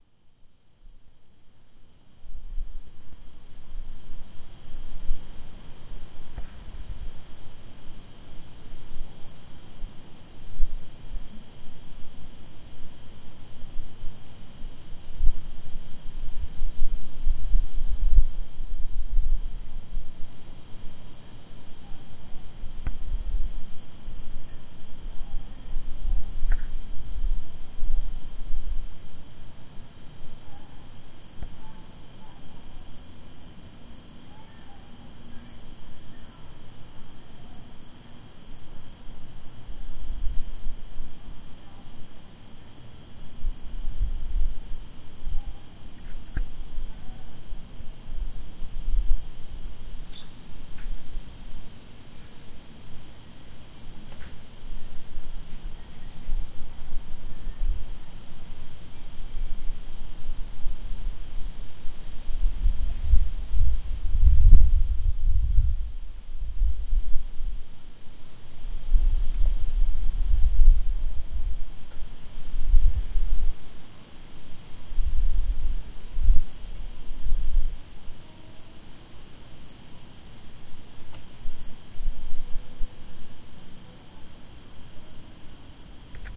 tascam recording of night-time outside in quiet neighborhood, chirping, still weather, late-summer, 2nd floor balcony over street
porch, outside, chirping, night